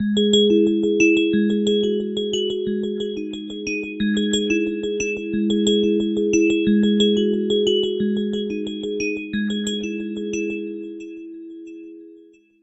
Some synth experiment in FruityLoops Studio. Enjoy!